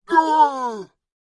Dialogue, Pained Yelp, Loud, D
Some pained vocal exclamations that I recorded for a university project. My own voice, pitched down 20%. These are the original stereo files, though I suggest converting them to mono for easier use in your projects.
An example of how you might credit is by putting this in the description/credits:
The sound was recorded using a "H6 (XY Capsule) Zoom recorder" on 15th December 2017.
agony, dialogue, hurt, male, pain, pained, scream, voice, yelp